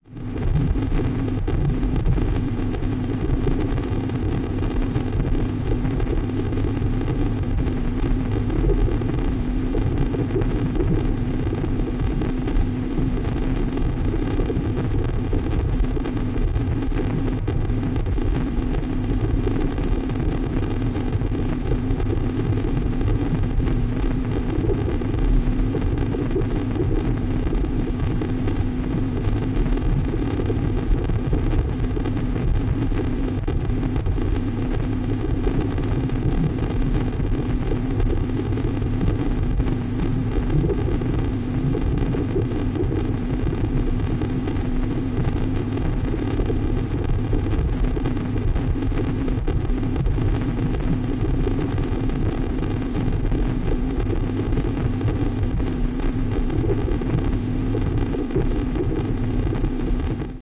20170421 Noise Hum and Crackles Created with Supercollider
Noise, hum, and crackles, created with programming in SuperCollider. This is created by playing around with Buffer recording and playing back.
hum, crackles, drone, noise